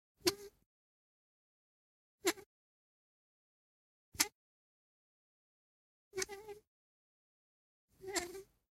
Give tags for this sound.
hinge rusty

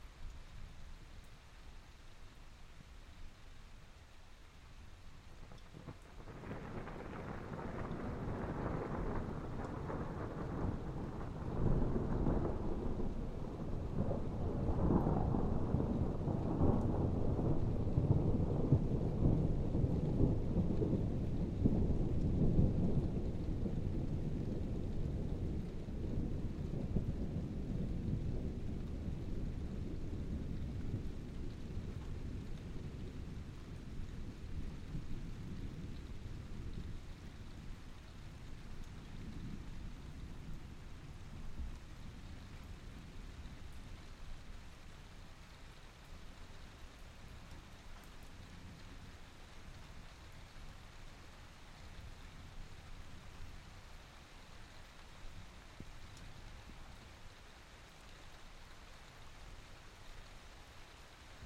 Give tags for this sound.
england field-recording lightning rain storm thunder thunder-storm thunderstorm uk weather

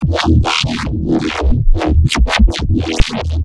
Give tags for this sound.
Dubstep EDM Glitch Synthesizer

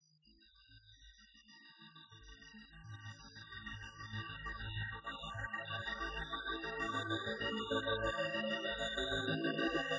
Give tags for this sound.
synth space ambient round-circus merry go

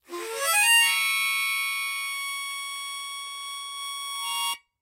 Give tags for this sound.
b harmonica key

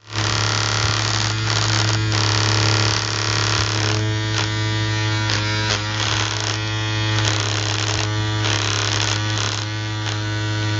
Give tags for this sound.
this machine broken

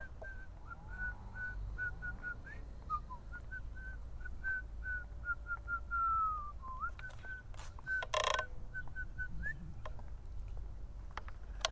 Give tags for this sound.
background-sound background

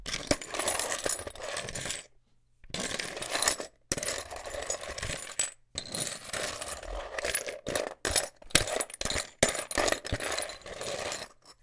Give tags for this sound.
shattered pieces breaking

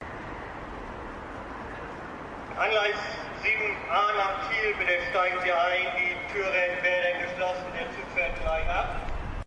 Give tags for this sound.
Ambience Announcement Hamburg Hauptbahnhof Station Train

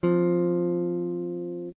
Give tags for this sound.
strum chord guitar electric

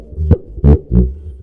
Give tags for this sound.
ethnic membrane folk clay bamboo rubbing putip naples caccavella percussion

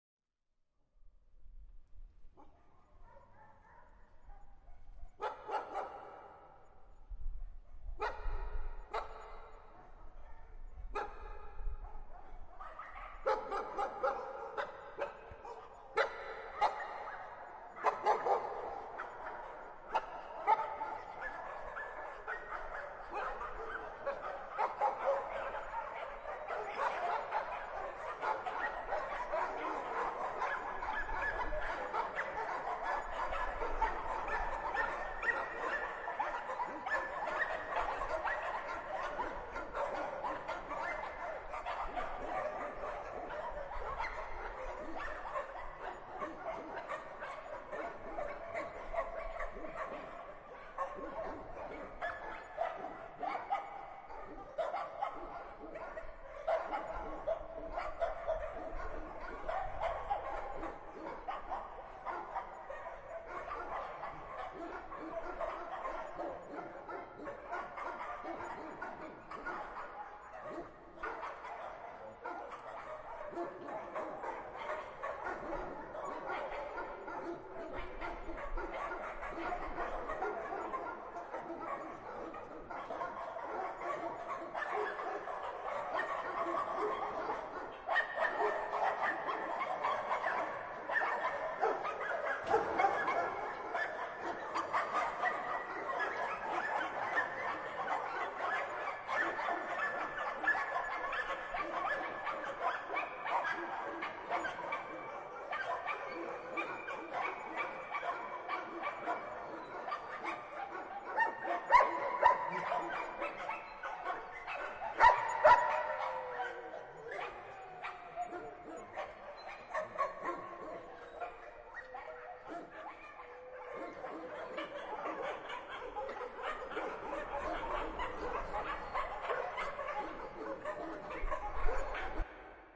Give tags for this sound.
barking dogs shelter

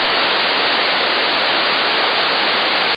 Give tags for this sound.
ambience ambient atmosphere background background-sound general-noise noise tv-noise white-noise

